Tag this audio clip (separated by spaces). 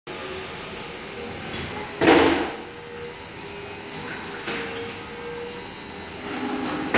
door garage opening